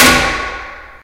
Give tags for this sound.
campus-upf UPF-CS14 bathroom toilet

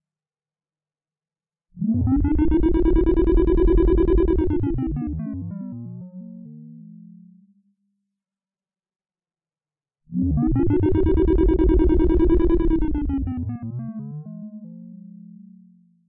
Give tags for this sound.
ambient analog experimental idm modular pd puredata rare

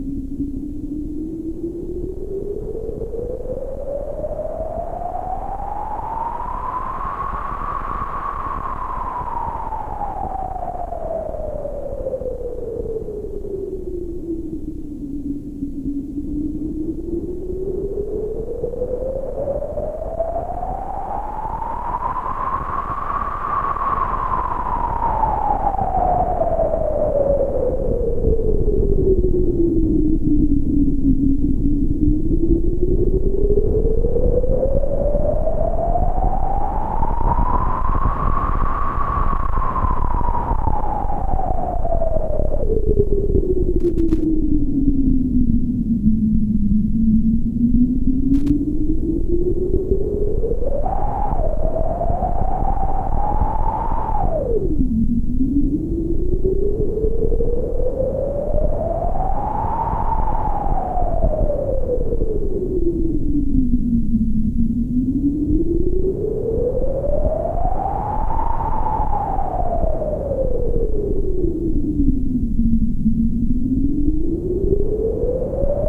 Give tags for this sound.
analog arp arp2600 electronic hardware noise sound synth

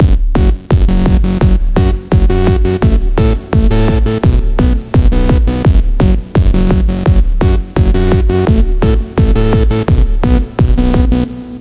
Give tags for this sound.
fl-studio loop techno

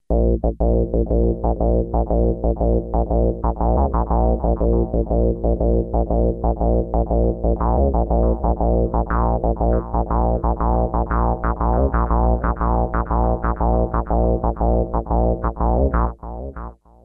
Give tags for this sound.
70s bass bass-loop bassloop dr-who radiophonic radiophonic-workshop

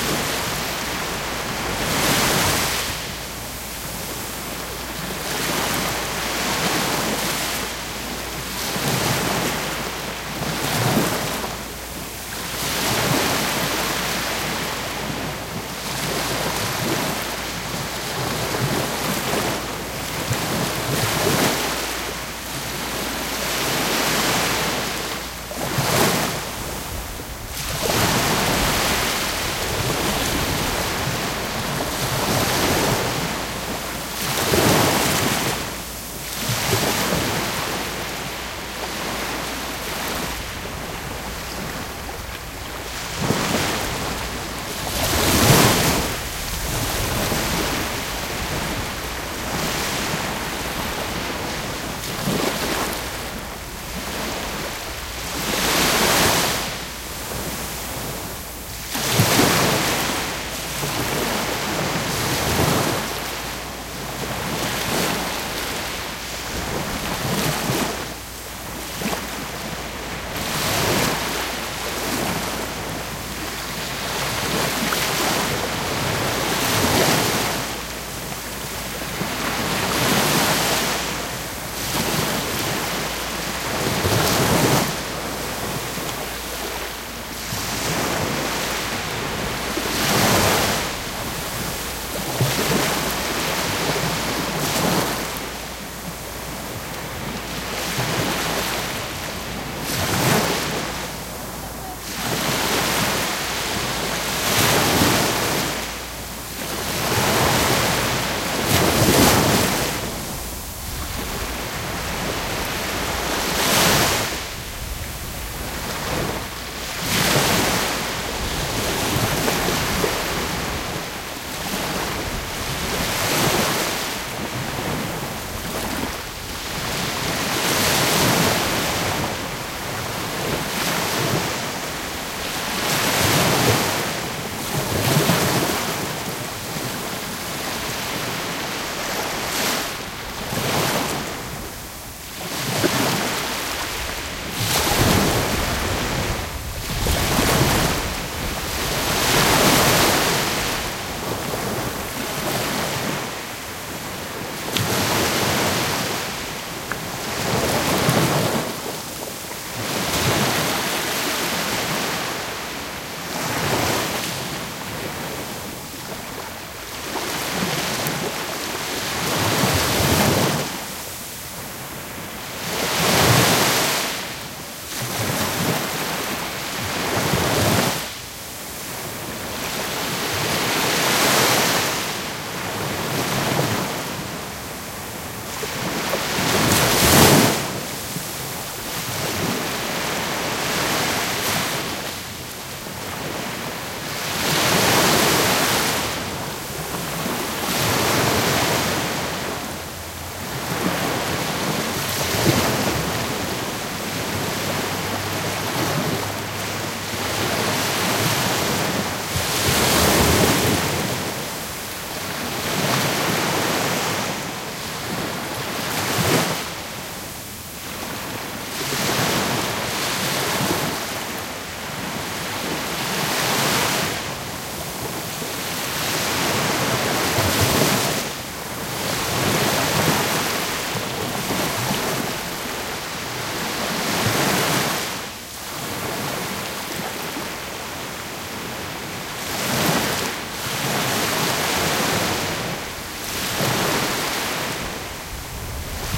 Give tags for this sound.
waves medium closeup beach

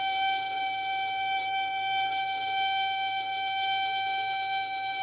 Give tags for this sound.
squeak sustain high pitched note long shrill

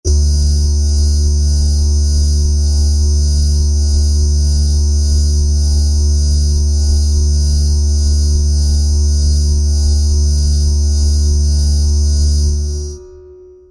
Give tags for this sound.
digital fx harsh sci-fi

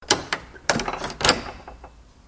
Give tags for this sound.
Church Clank Close Closed Door Doorway Handle Squeak Wood Wooden

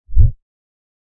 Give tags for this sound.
effect movement over

